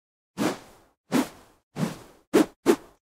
Several whooshing sounds made by swinging a stick through the air. Recorded using an ME66. Thanks to Carmine McCutcheon.